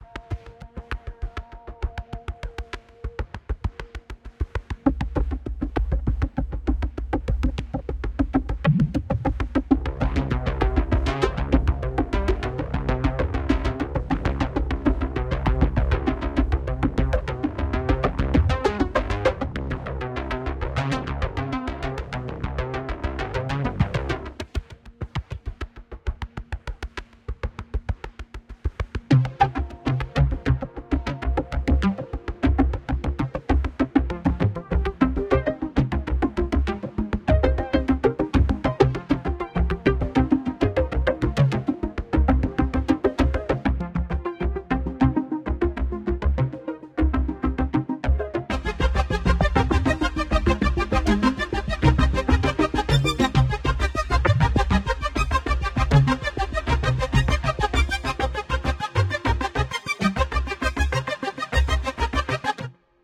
Electric Road 2 - Synth Drone Electric Cinematic Music
Abstract, Ambient, Atmosphere, Drone, Electronic, Film, Movie, Music, Pad, Retro, Synth